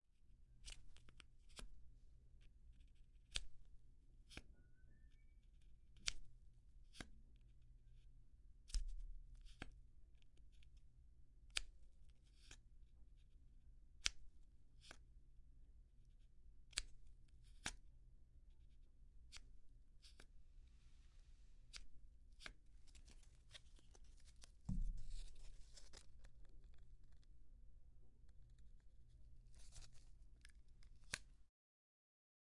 58-Putting A Pin On A Board
Putting A Pin On A Board